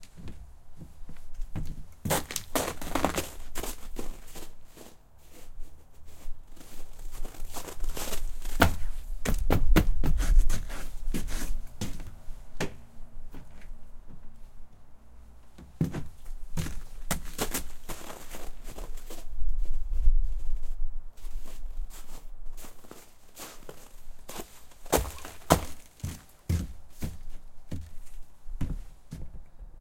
Floor walking
floor, footsteps, Walking